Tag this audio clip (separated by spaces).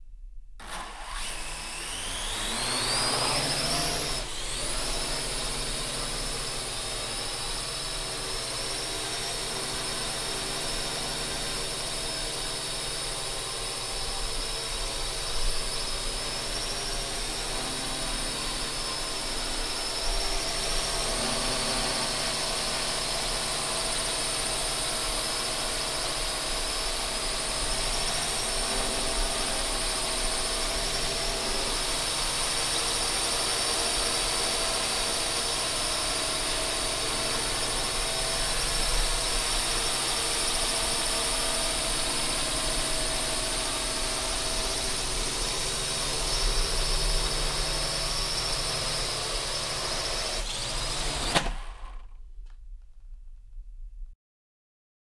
drone engine far flying h6 helicopter launch propeller quadrocopter startup warmup xy